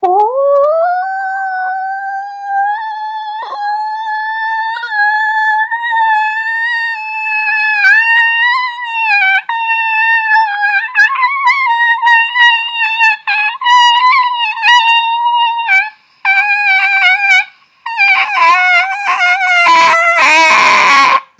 sound I made with my voice